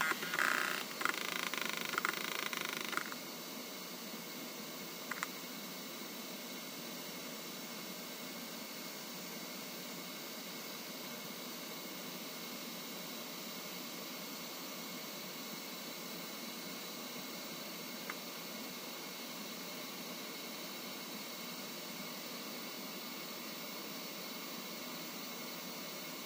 Vintage Hard Drive Read and Idle
An old hard drive starting up. The idle sound is from the hard drive itself and not the computer's fan noise. Some minor editing has been done to remove a slight bass rumble from the computer's fan. Recorded on Sony PCM-A10.
Old, Terminal, PC, Hard, Computer, Drive, Idle